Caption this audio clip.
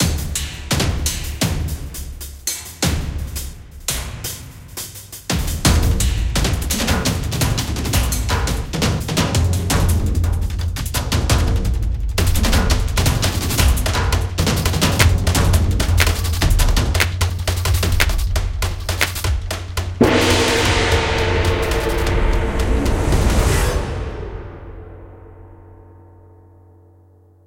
challenging-countdown-20s
Mixed special challenging 20 seconds countdown. This actually ends on gong sound at 0:20, then leaving cluster hit.
Xinematrix for "Action Percussion Ensemble Fast" tracks and "Orchestra Cluster Hit";
NoiseCollector for "909 clap";
GowlerMusic for "Gong".
909, action, adventure, challenge, cluster, countdown, ensemble, fast, GowlerMusic, messy, NoiseCollector, percussion, rhytmic, tempo, Xinematrix